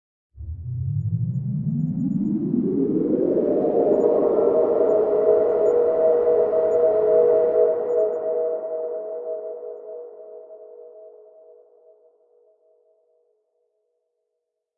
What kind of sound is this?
Filtering cutoff and messing with reverb parameters on an airy Massive patch.